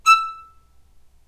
violin spiccato E5
spiccato, violin